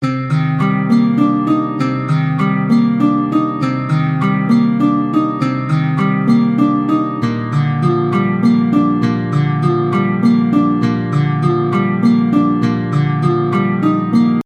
Medieval Lute Chords
A lute playing two chords, one note at a time. in 3/4, 100 beats per minute. has a robin hood/minstrel vibe.
100-bpm, Cm, Old, Smooth, String, World